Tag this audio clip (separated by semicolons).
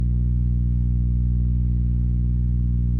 Free; Noise; Fan